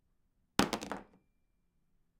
Hunk of Metal Drop 2
Another take of a large hunk of metal being dropped
hunk, chunk, big, metal, dropping